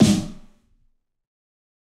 Fat Snare EASY 034
This is The Fat Snare of God expanded, improved, and played with rubber sticks. there are more softer hits, for a better feeling at fills.
kit, rubber, drum, realistic, fat, snare, sticks, god